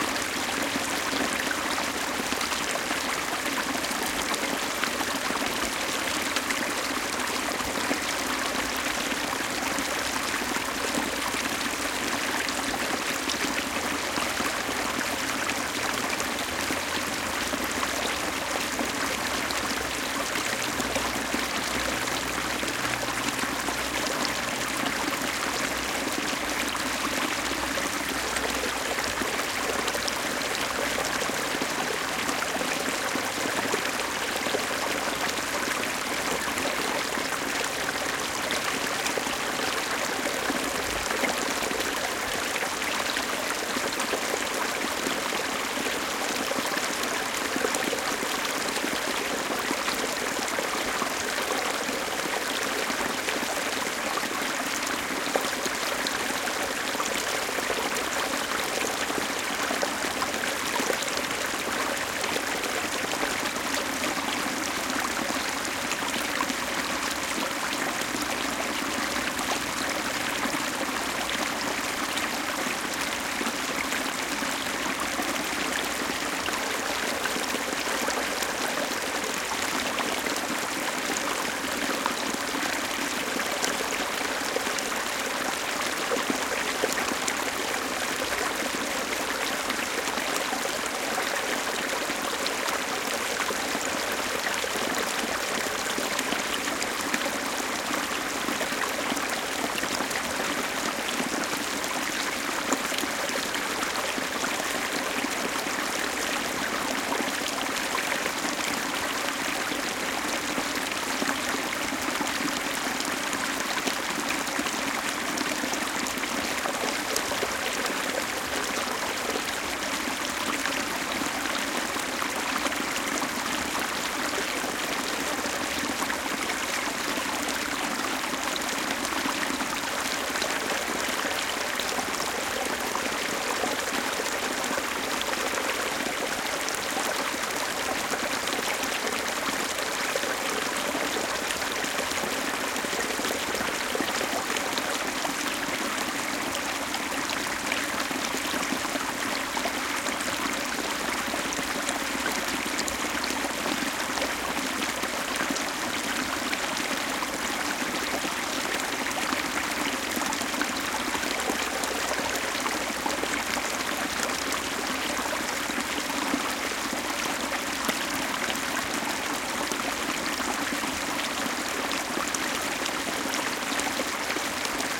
small creek
atmosphere, brook, creek, field-recording, gurgle, stream, water